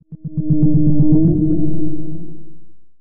An single reverberated whale-ish sound to be used in sci-fi games. Useful for creating an alien "Hello".